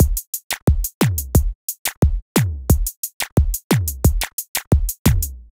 A wheaky drum loop perfect for modern zouk music. Made with FL Studio (89 BPM).